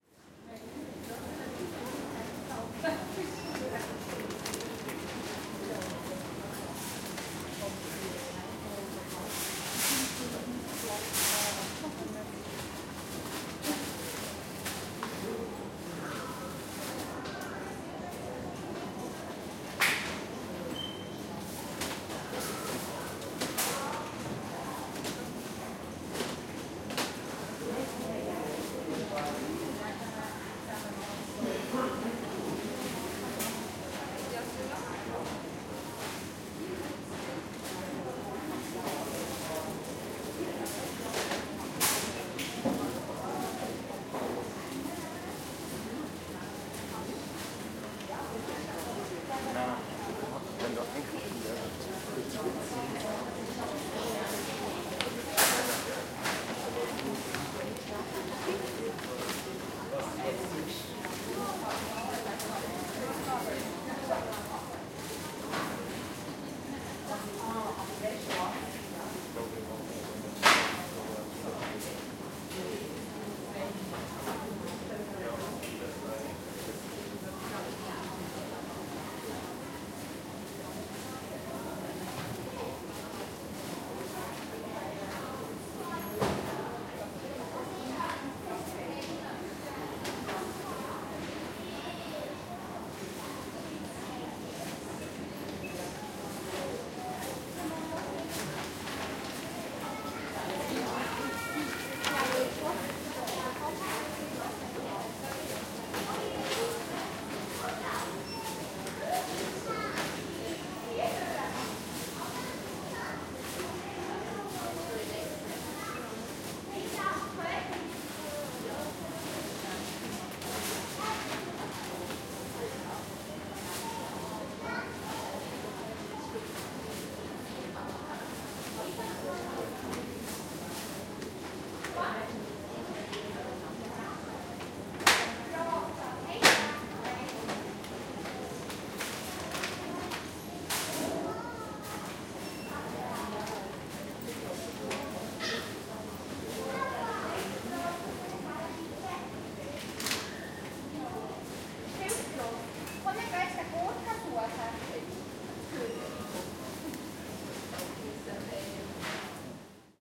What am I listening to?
People are shopping in a supermarket. You hear plastic bags, the beeping of the cash register, people talking, someone is handling boxes.
Recorded in Köniz, Switzerland at a Migros.